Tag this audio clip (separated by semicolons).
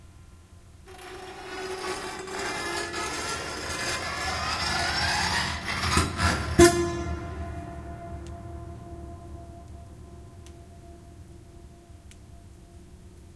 effect; industrial; horror; sound; fx; soundboard; piano; acoustic; sound-effect